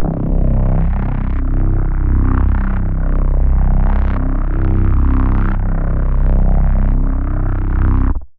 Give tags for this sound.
horror
synth
bass

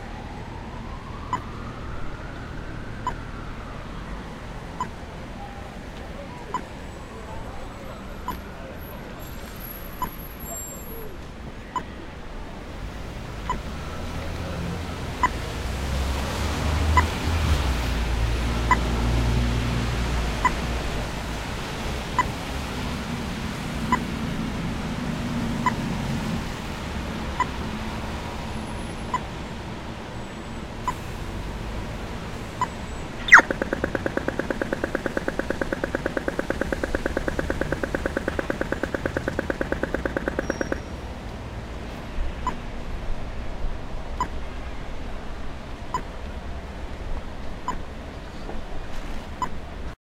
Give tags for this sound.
Light
City
Urban
Traffic

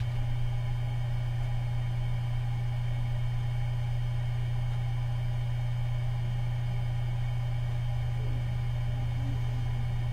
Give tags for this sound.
mechanical
machine

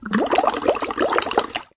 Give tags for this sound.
straw
sony-ic-recorder
bubbles
water
drink
blowing

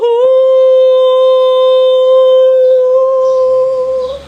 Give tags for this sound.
human
male
man
vocal
vocalizations
voice

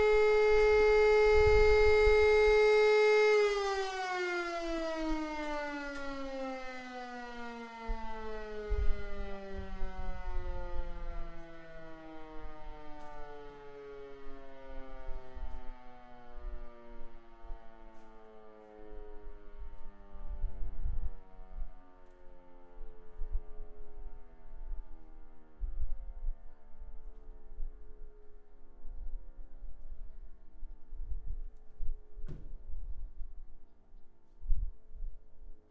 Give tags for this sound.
field-recording
siren

